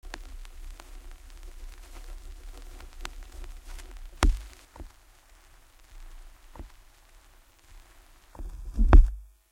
Vinyl Runout Groove 02
Run out groove of a 12" LP @ 33⅓ RPM.
Recording Chain:
Pro-Ject Primary turntable with an Ortofon OM 5E cartridge
→ Onkyo stereo amplifier
→ Behringer UCA202 audio interface
→ Laptop using Audacity
Notched out some motor noise and selectively eliminated or lessened some other noises for aesthetic reasons.